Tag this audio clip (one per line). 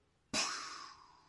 Foley
Random